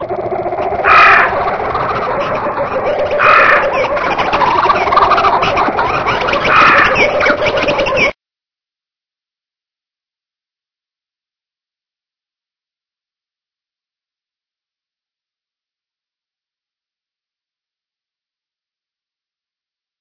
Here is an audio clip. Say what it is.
jungle birds
birds in the african jungle at night
jungle, exotic, birds, africa